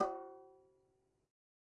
Metal Timbale left open 017
conga, drum, garage, god, home, kit, real, record, timbale, trash